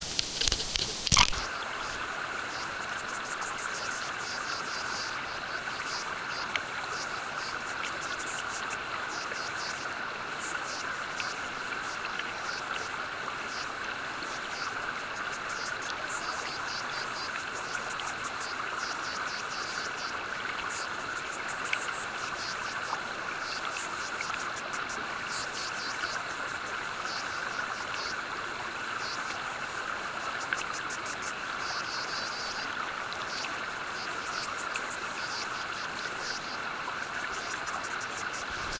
Underwater creatures in creek
So I put my hydrophones in the upper reaches of the Baron River (Mount Hypipamee National Park, far North Queensland - Australia) expecting to hear only gurgling and bubbling, but to my surprise there was some aquatic creatures communicating (sounds a little like crickets). I suspect it's either a fish, crustacean or insects of some sort. Recording chain: Home made hydrophones from Panasonic WM61-A - Edirol R09HR.
aquatic-sounds, atherton-tablelands, baron-river, Baron-River-Australia, creek, hydrophones, mount-hypipamee-national-park, mystery, rainforest, underwater